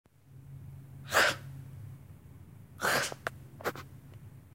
Ataque cocodrilo
animal, Ataque, cocodrilo